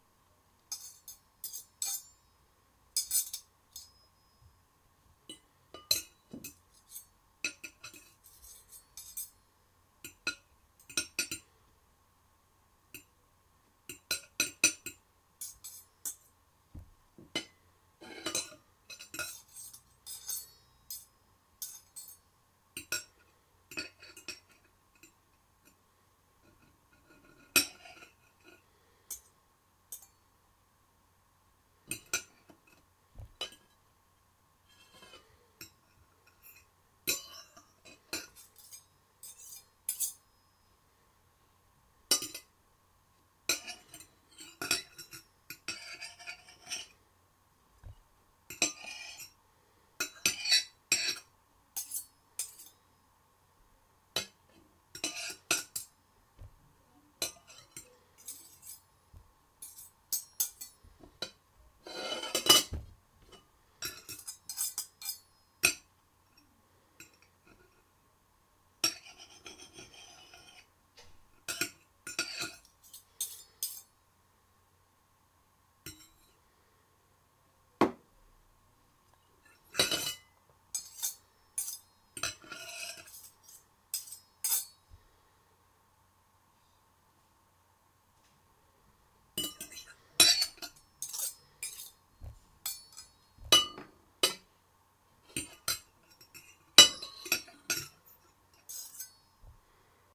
forks knifes dish
A sound of fork and knife clanking on each other and on the plate.
clank, dish, eating, fork, knife, plate